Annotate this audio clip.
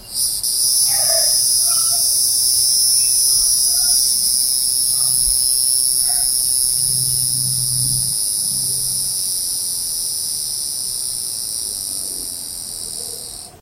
I was looking for cicada sounds online and couldn't find one that sounded like the ones here... However I got lucky and eventually was able to get a nice, clean recording of one! Recorded using a Sony IC Recorder out my house. Processed in FL Studio to remove noise.